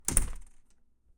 Back door shut2
close, door, shut